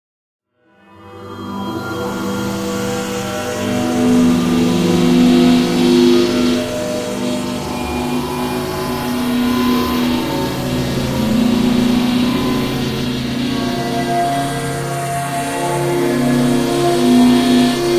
New Orchestra and pad time, theme "Old Time Radio Shows"
ambient, background, oldskool, orchestra, pad, radio, scary, silence, soudscape, strings